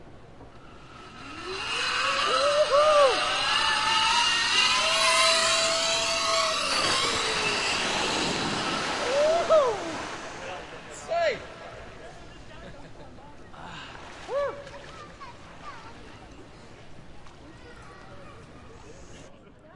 Folks riding a "zipline" or trolley line into a cooling splashdown in a small lake in the foothills of the Blueridge Mountains of the upstate South Carolina.
Folks having fun in the summertime.
Fostex FR2-LE / Rode NT4